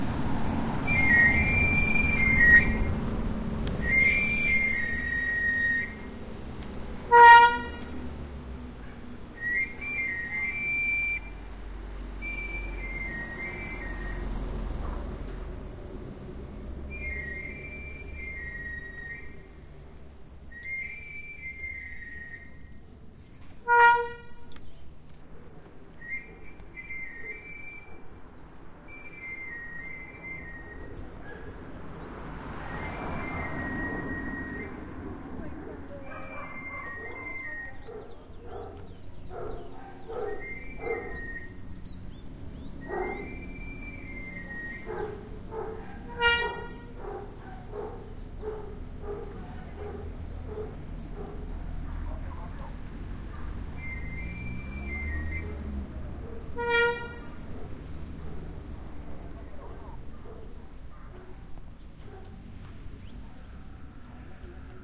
knife sharpener
this audio was recorded in Cali, The whistle sound is made by a man while riding his bicycle around the neighborhood. El afilador de cuchillos en Cali. un sonido en vía de extinción.
calle, cali, field-recording, city, extintos, afilador, grinder, knife, colombia, sonidos, street